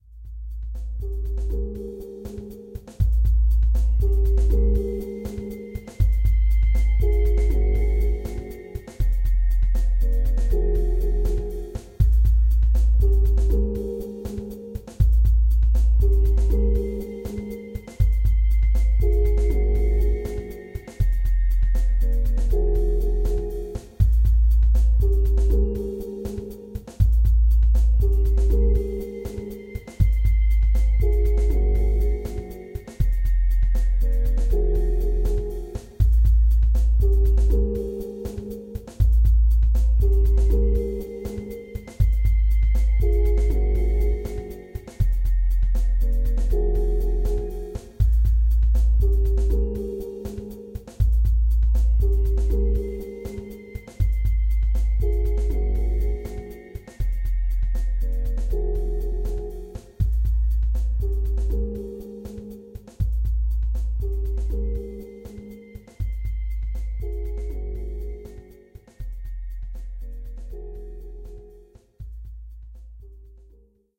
Sample I made in Ableton. It was going to be a song, but I erased the files so it sits as is.
I don't need any props, but if you use it, I'd love to see what you used it for! :3
-DJ Pembroke
Peaceful, Rhythm, Looped, Trip-Hop, Ambient, Beat, Chill, spatial, Drum, Hip-hop, Relaxing, Loop, Ableton, Smooth